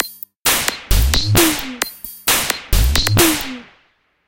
Electro Loop 132 BPM
This is some kind of eletro drumloop made @132 BPM with FL Studio.Enjoy!
132, bpm, drum, drumloop, electro, loop